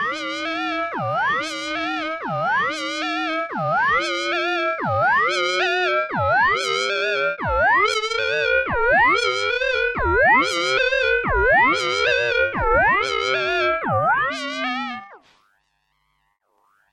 third, stuck with an alien,(small room)
3, homemadevocoder, vocodervice1